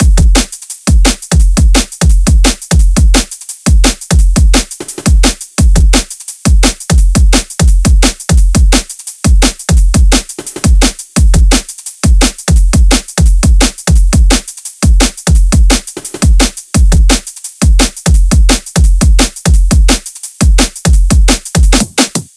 dnb-drums-172-01
172, 172bpm, bpm, dnb, drum, drumnbass, drums